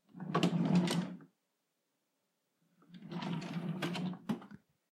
drawer opened and closed (e)
A sound of a drawer being opened and then closed. Recorded with a phone and edited with audacity. I would really appreciate it!
closing, drawer